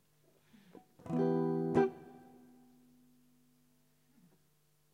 Simple kind of guitar chord